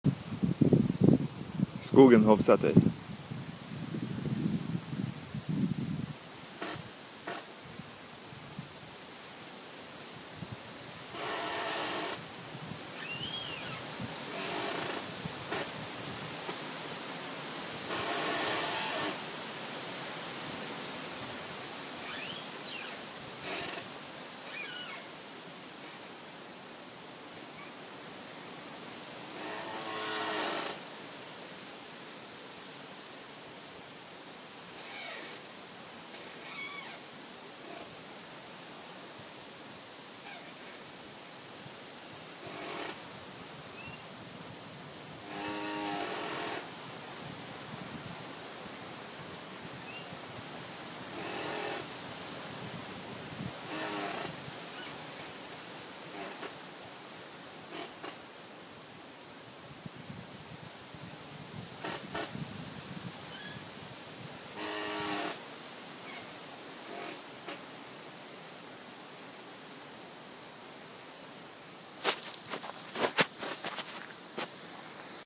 windy forest
One morning I was walking my dog it was very windy. I heard a tree that had fallen several years ago, make a squeeky sound towards another tree. I used the sound on old footage on a wooden-boat.
windy-forest, windy-leaves, squeeky-tree, tree, squeeky, branches, norway, storm, leaves, oslo, hovseter, trees, wind, field-recording, nature-sounds, windy, nature, forest